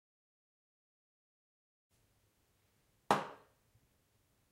Noise from pub/club/bar